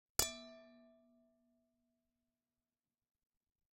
A metal colander being hit with a hammer to create a 'bing' sound. Recorded with a behringer c2 pencil condenser through an m-audio projectmix i/o. No processing just topped and tailed.